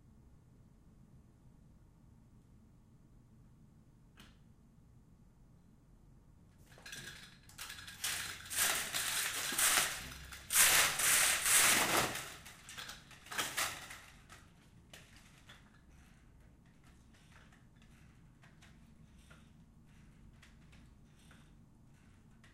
Opening vertical blinds. Stereo recording (Zoom H4, internal mics.)